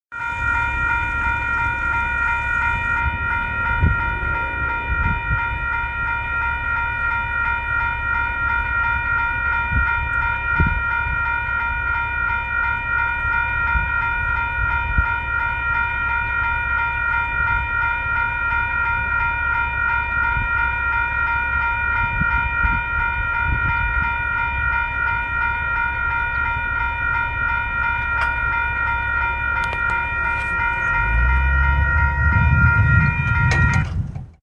Railroad crossing in Kansas
This is the sound of railroad crossing bells clanging in Kansas.
bells, clanging, crossing